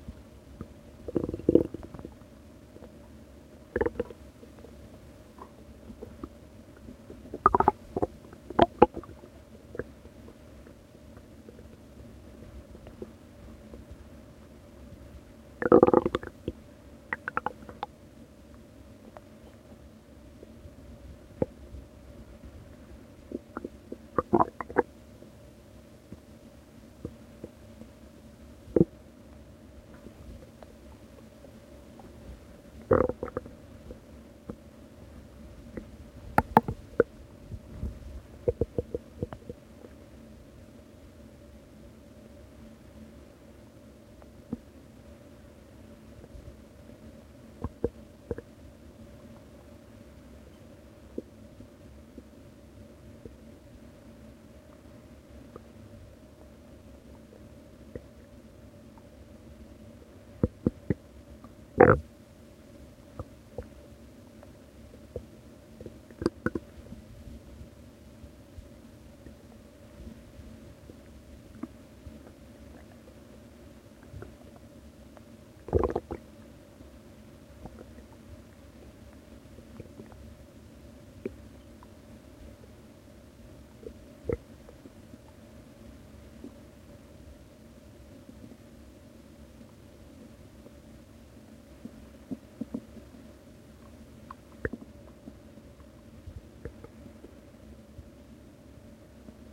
Quiet bubbling and groaning on an empty stomach.